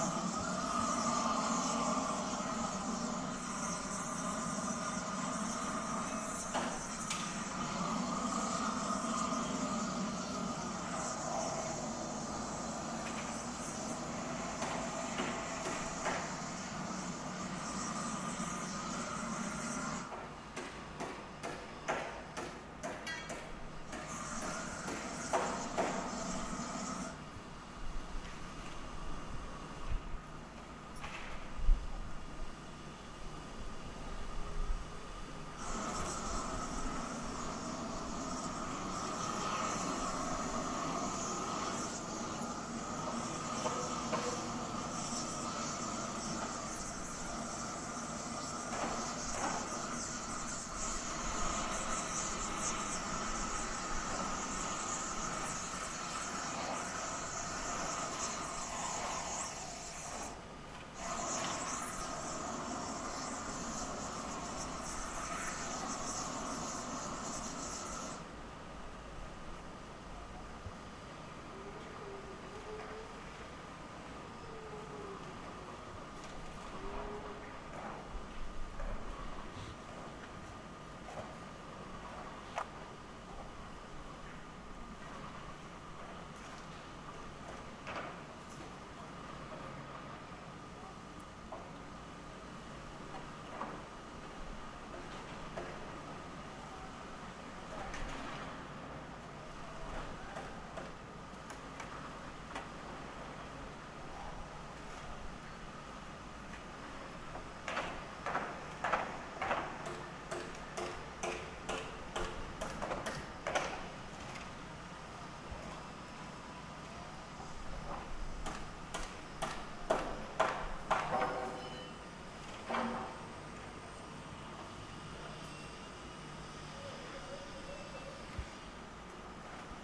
Construction site with burner 1
Construction site with nail gun, distant circle saw, and a flame thrower adhering tar mats in the foreground.
construction, construction-site, field-recording, flame-thrower